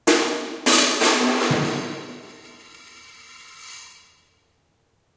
Exactly as described. Kicking a paint can.